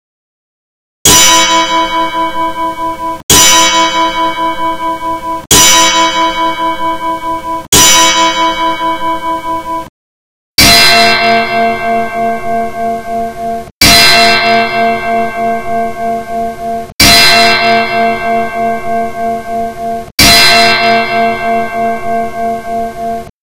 belltype snd of brass bowl
of me Nans brass bowl
raw, recording